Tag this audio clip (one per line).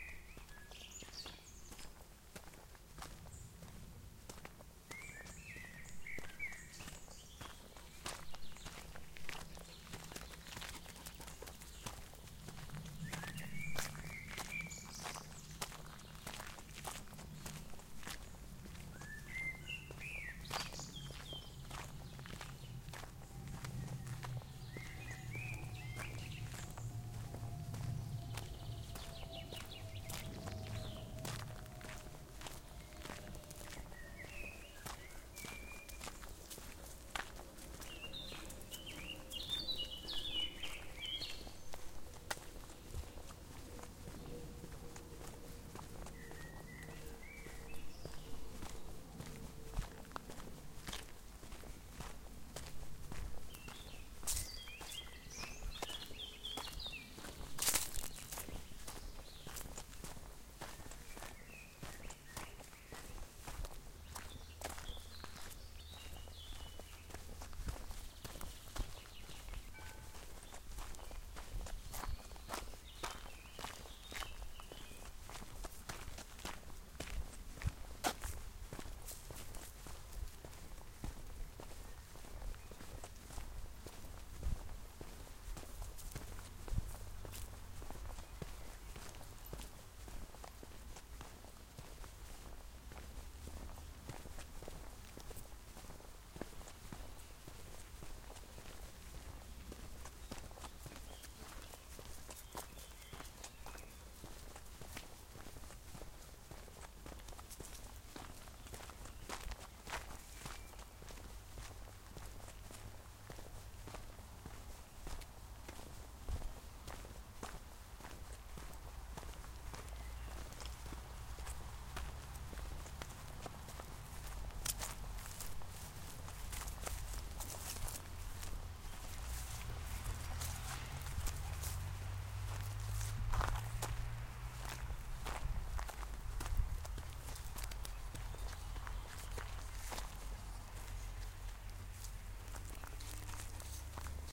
birds
walking